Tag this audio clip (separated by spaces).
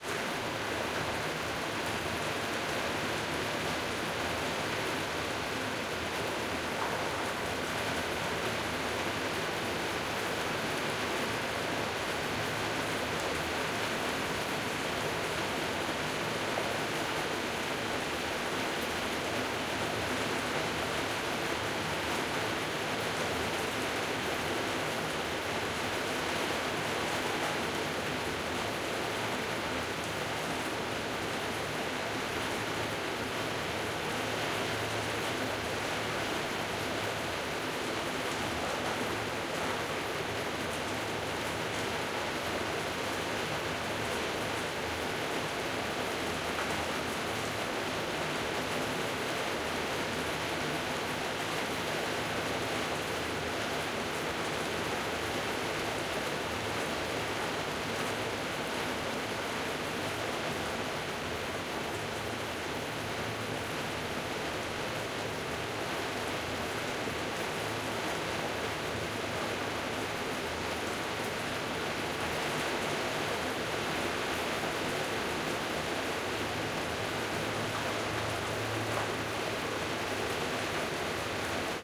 inside rain